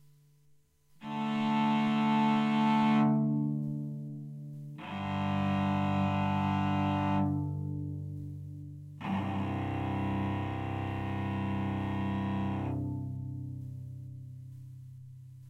A real cello tuning, open strings. Recorded with Blue Yeti (stereo, no gain) and Audacity.